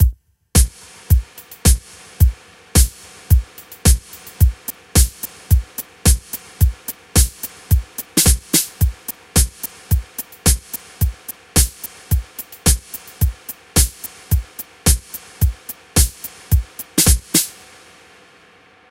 109 bpm 70s style drum loop
here's a custom drum loop from some handpicked and mixed down drums that I made.
drum, loops, 70s